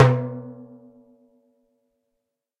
Timbales Low (Hembra)
One shot sample of my LP Matador Timbales.
Hit on the low drum (Hembra)